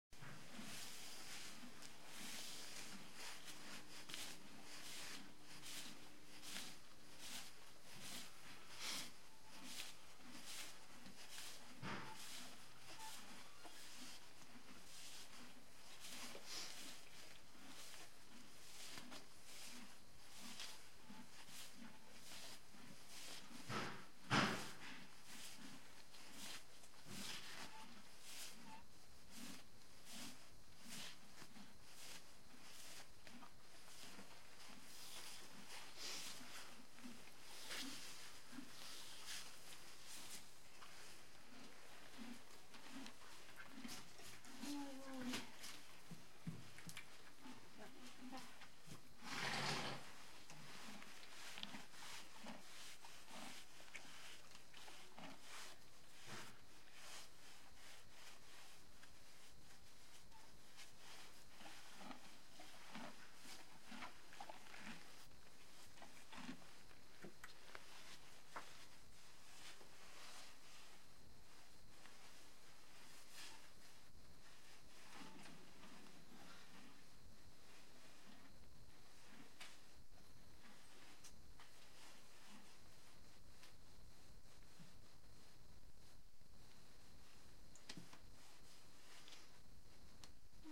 Horses fur being brushed – Sounds from afar.